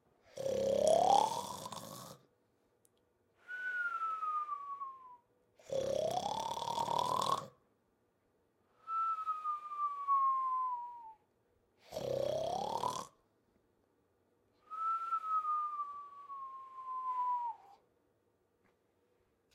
Very slow over exaggerated snoring.